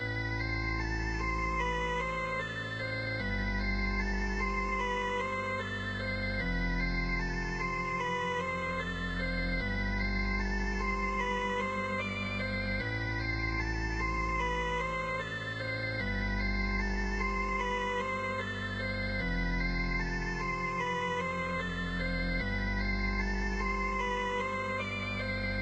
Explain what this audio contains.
Hypo-Lead-150bpm
Lovely, fluent organ-like synth loop.
Useful as main or background synth-loop.
150bpm, beat, break, breakbeat, dnb, dub, dubstep, floating, high, liquid, long, loop, low, organ, step, synth